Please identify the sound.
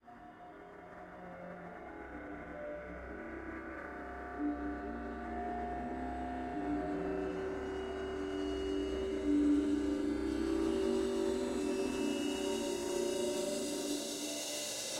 A combination of recorded back ground noise, with applied noise reduction,a very stretched bongo, an oven fan and a backward crash cymbal. Reverb and slight delay added.